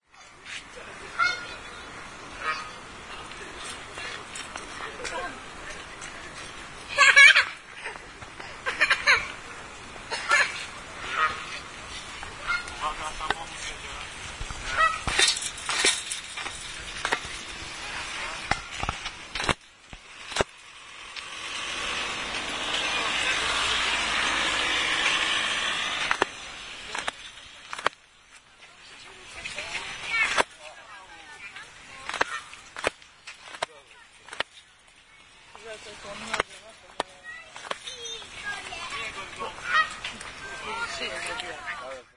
strus i gesi
20.09.09: about 18.00 in the New Zoo in Poznań/Poland. sounds produced by gooses and the ostrich. The ostrich is snapping its beak.